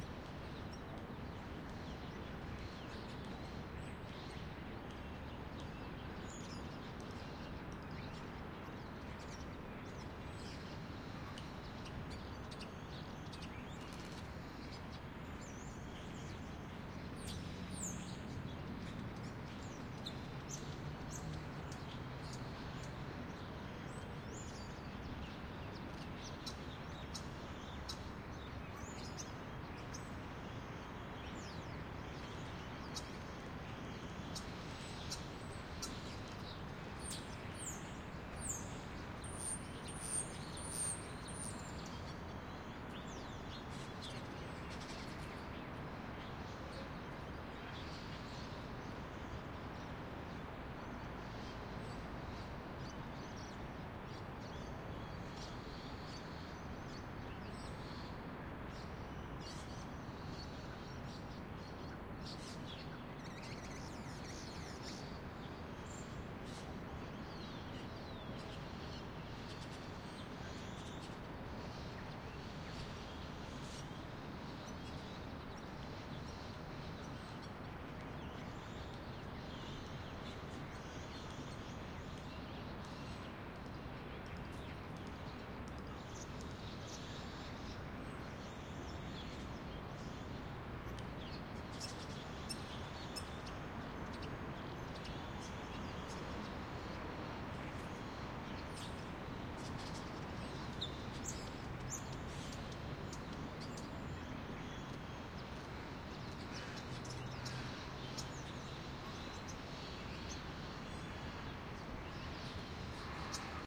Ambience City
Street noise recorded in a middle of Melbourne, early morning (not much traffic)
atmosphere, bird, city-noise, field-recording, birds